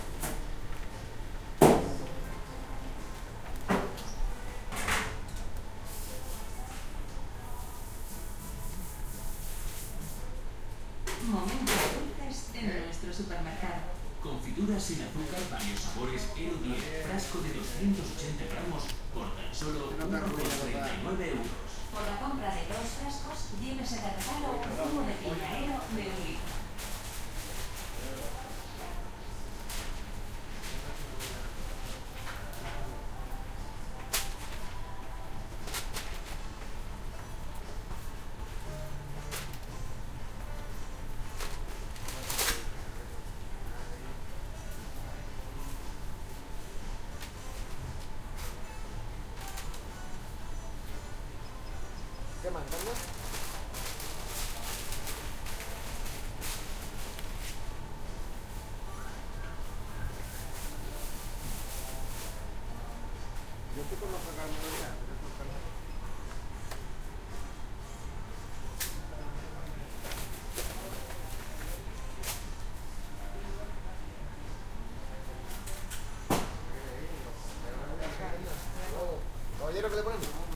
ambiance inside a supermarket, Spanish PA system / ambiente dentro de un supermercado, megafonía en español
field-recording, city, shopping, pa-system, tannoy, ambiance